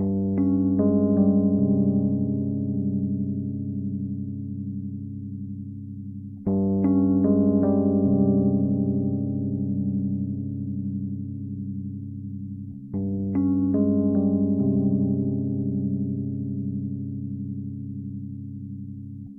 rhodes mystery bed 6
Arpeggio chord played on a 1977 Rhodes MK1 recorded direct into Focusrite interface. Has a bit of a 1970's mystery vibe to it.
keyboard, mysterious, electric-piano